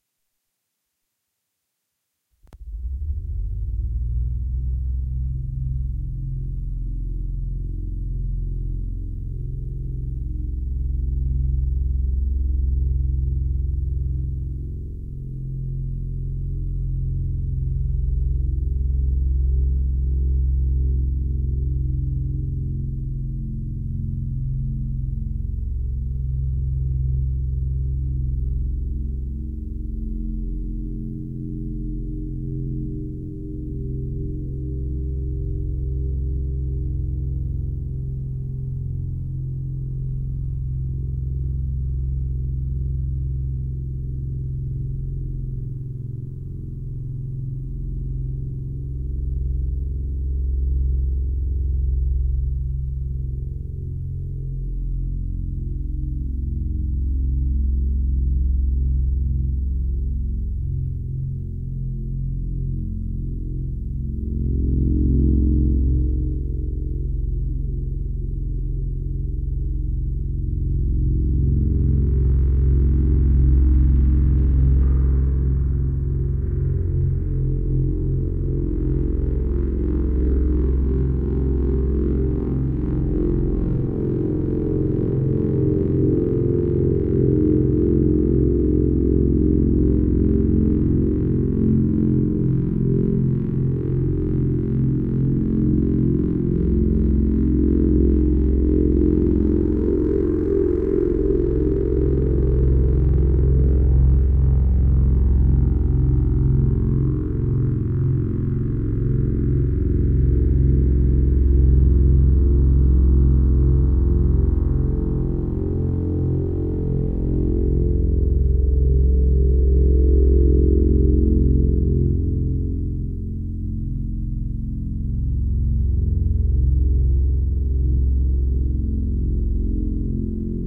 One note created with the "Dronos" VST
Effect: Reverb
ambient, atmospheric, bass, cavern, cavernous, deep, effect, fx, loop, odds, sound, soundscape, sub, subwoofer